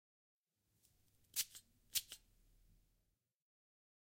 nasal-spray-2-short-stereo
Two short sprays from a nasal spray, which is almost empty (ZOOM H6)
medicine, nasal, spray, spraying